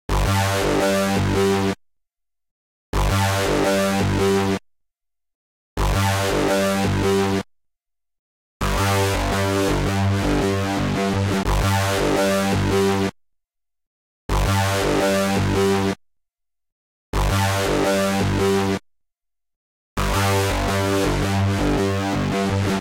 Ani MooG Synthy 169
Synthline created with the AniMoog Anisotropic Synth
Drum-and-Bass, Dubstep, Electro